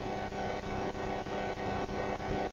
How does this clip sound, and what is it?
dead signal2
analog, comms, communication, digital, distorted, distortion, electronic, field-recording, garbled, government, military, morse, noise, radar, radio, receiver, signal, soundscape, static, telecommunication, telegraph, transmission, transmitter